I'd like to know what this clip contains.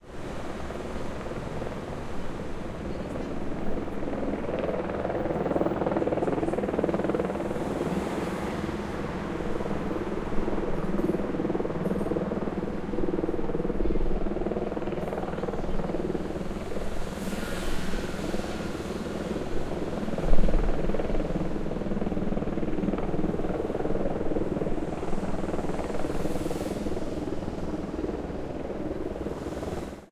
Helicòpter policial durant l'operació #BancSorpresa del Banc Expropiat de Gràcia
helicopter, police, field-recording, street, city